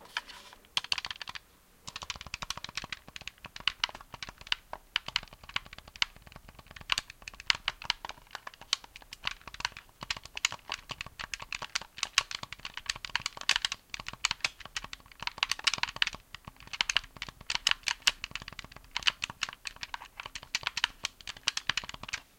Sound made by a game controller